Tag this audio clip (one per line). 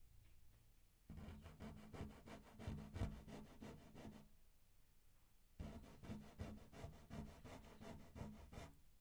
Scratching
Wood
OWI
Scratching-Wood